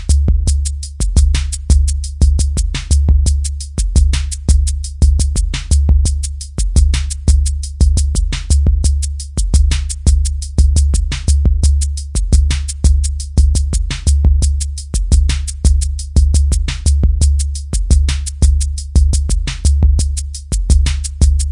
Kastimes Drum Sample 6
percussion-loop, drum-loop, drum, percussive, quantized, drums, groovy